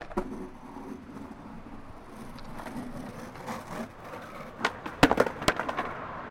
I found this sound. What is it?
skateboarders attempting tricks - take 03